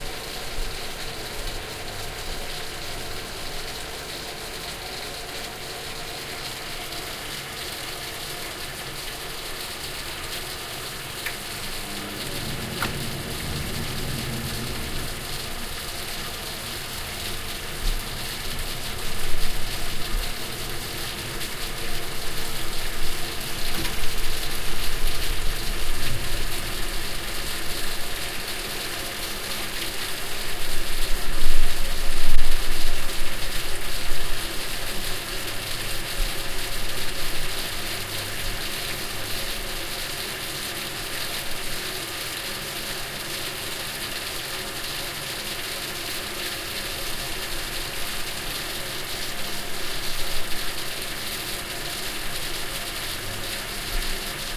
chaudiere-defaut
My water heater is defective and makes noise like hell
machine, boiler, heating, heater